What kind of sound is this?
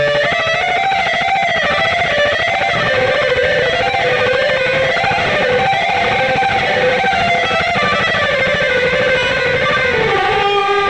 Chopped up pieces of a guitar solo stripped from a multritrack recording of one of my songs. Rogue electric strat clone through Zoom guitar effects.